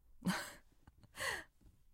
woman having a small sensual laugh
woman having a small laugh very close, a tiny bit of a sensual sound to it.
laughter, humor, smile, laugh, girl, woman, female, jolly, funny, joy, giggle, women, sensual, happiness